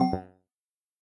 a quick 'you loose' sound with a no-no feel